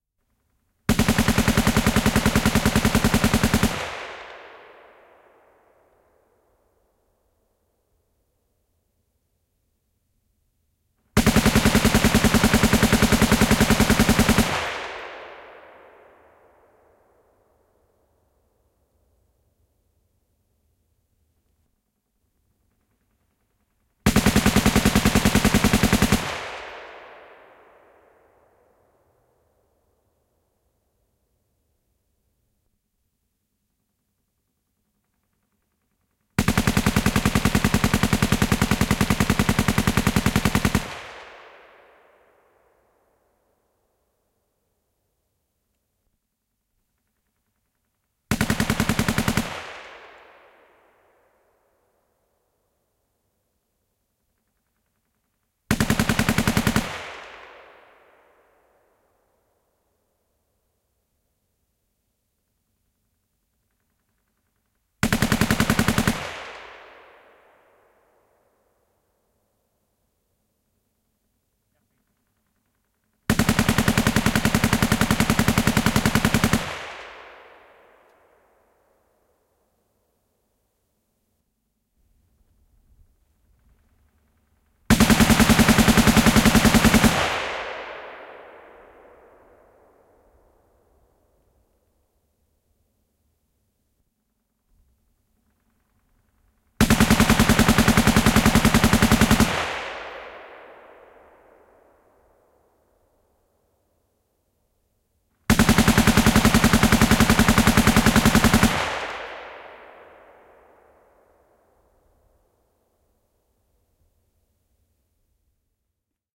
Konekivääri, sarjatuli, ampuminen / A machine gun, Russian Maxim, shooting, sustained fire, variable distances
Venäläinen kk Maxim. Sarjatulta, kaikua, etäisyys vaihtelee.
Paikka/Place: Suomi / Finland / Hämeenlinna, Hätilä
Aika/Date: 01.11.1984
Ammunta, Ampuminen, Ase, Aseet, Field-Recording, Finland, Finnish-Broadcasting-Company, Firing, Gun, Gunshot, Konetuliase, Laukaukset, Laukaus, Sarjatuli, Shooting, Shot, Soundfx, Suomi, Sustained-fire, Tehosteet, Weapon, Weapons, Yle, Yleisradio